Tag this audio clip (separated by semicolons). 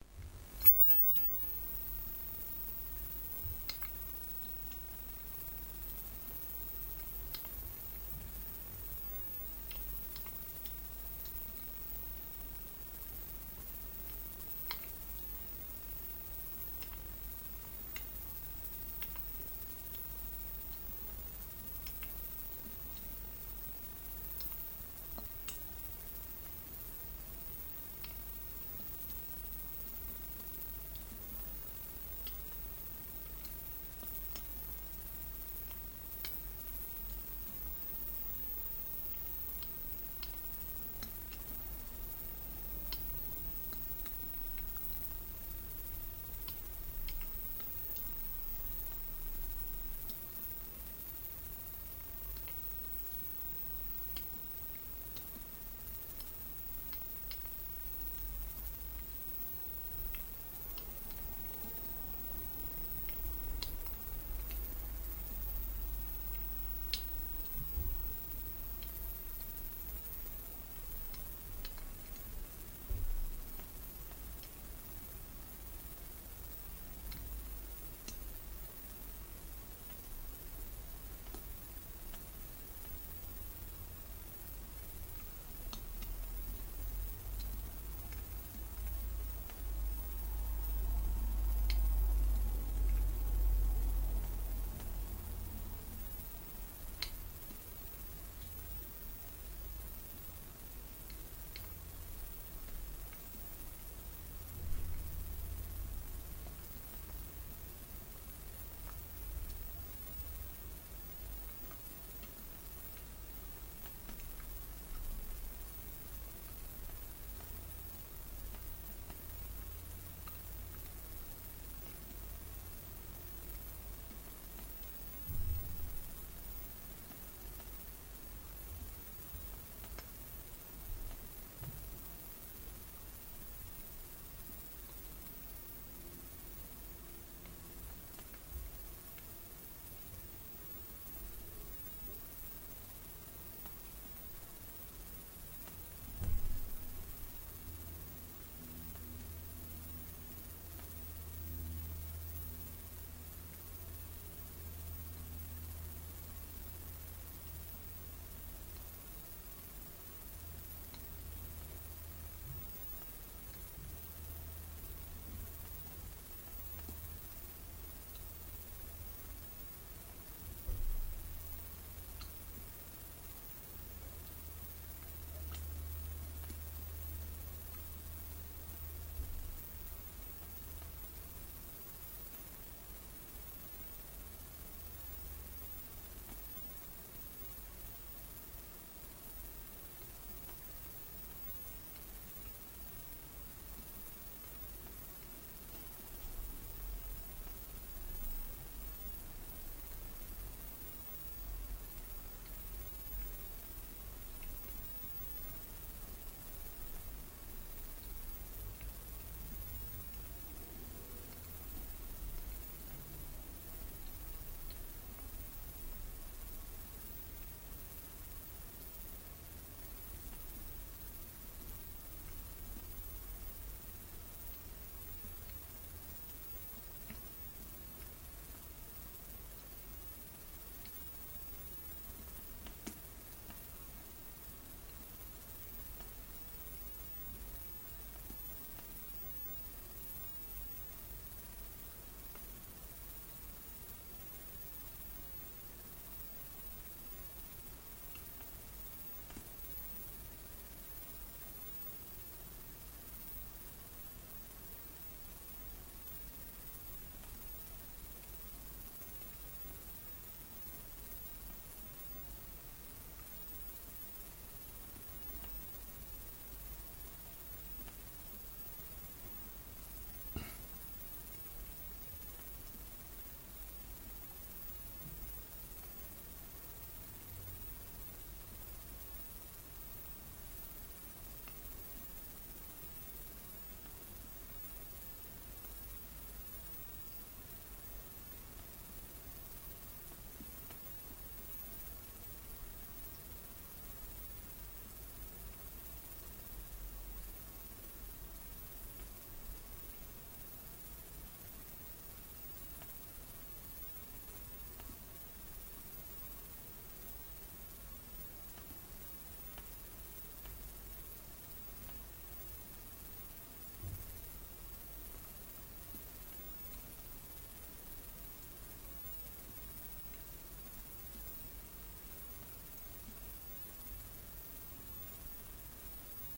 Alveolus; ATV; Battery; Beam; BroadBand; Channel; Control; ECU; Efficiency; Engine; Field; Fraser; Isosynchronous; Jitter; Lens; Mirror; Network; Path; Proof; Rack; Shelf; Slot; Stream; Trail; Unit; UTV; WideBand; WiFi; WiMax; Xanthium